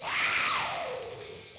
Male Scream
Screaming
Origin Sound
creepy, horror, human, male, man, scream, screaming, shout, voice, yell, yelling